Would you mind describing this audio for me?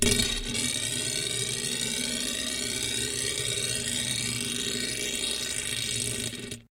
a washer spinning its way down a length of threaded rod, or bolt rod. This one is the dry sample. My username is the same if you want to search by author, otherwise do a filename search using "washerspin"
gurgling, metal, oscillating, shimmying, spinning, whirring